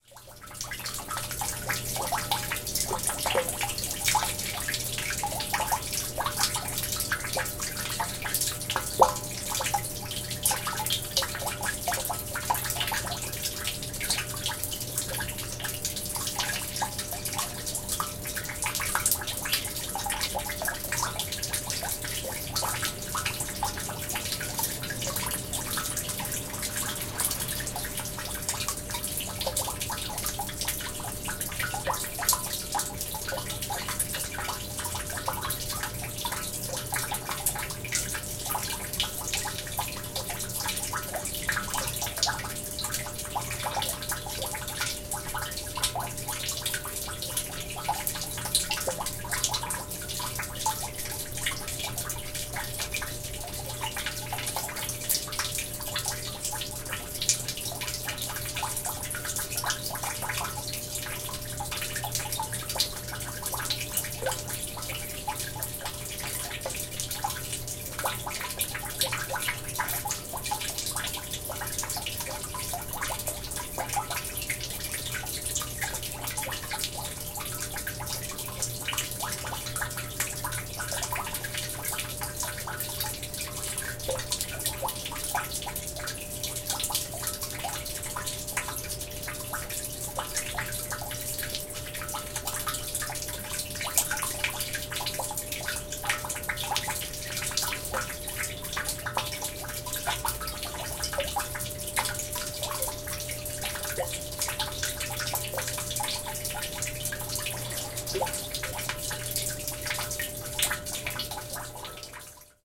110811-drain near of factory
11.08.2011: twelfth day of ethnographic research about truck drivers culture. Oure in Denmark. In front of fruit-processing plant. Drain under the truck. rain water flowing down the drain. Swoosh of the factory in the background.
field-recording, swoosh, drizzling, spitting, raining, drip-drop, spit, factory, drizzle, water, drain, rain